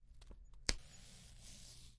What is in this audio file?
sewing effect, for this I used a paper sheet and a needle with thread
sew, stitches, stitchup